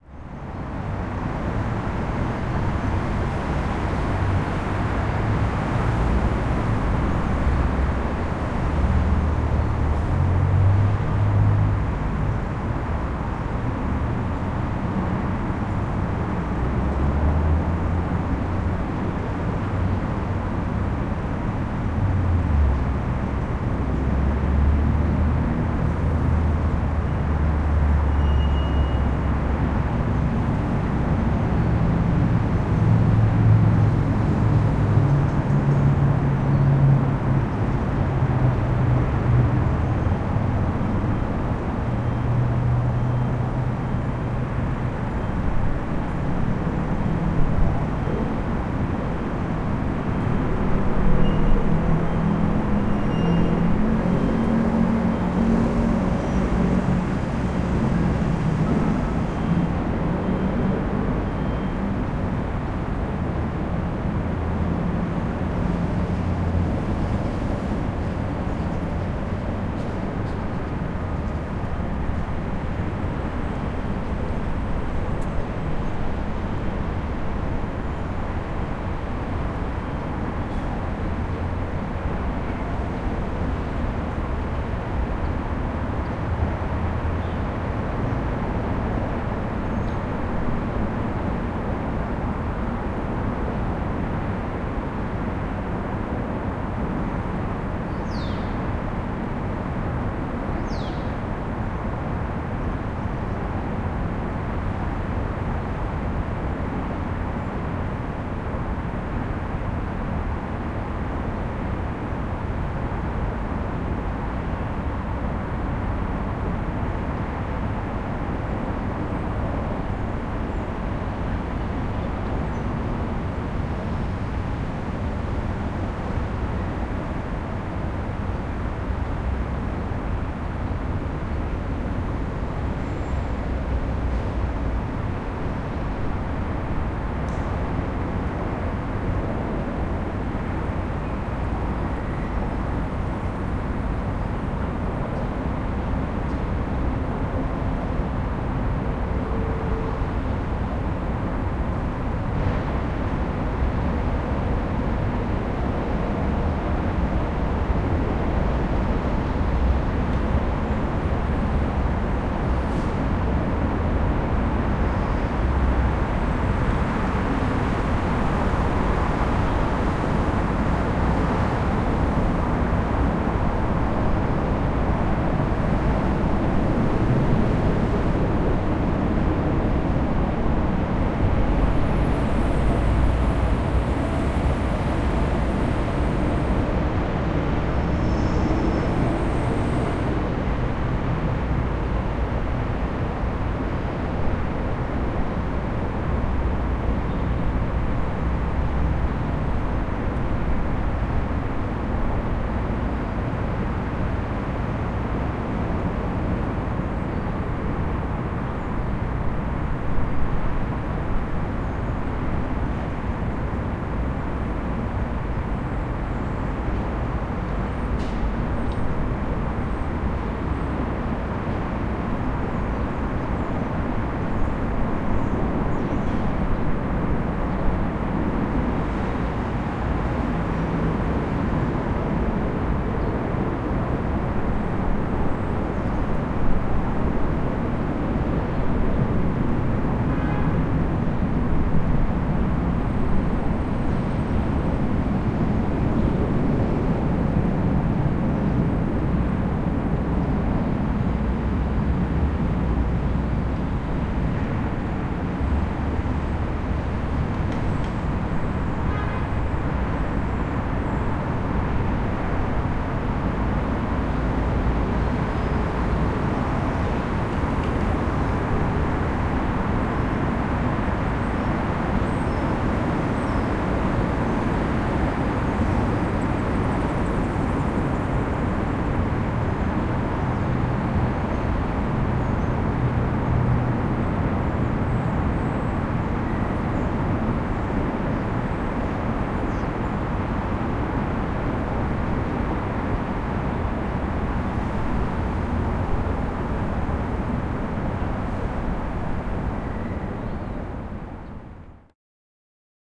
Afternoon traffic overhead by the Caltrain tracks